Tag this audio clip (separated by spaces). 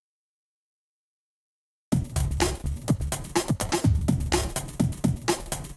bacon beat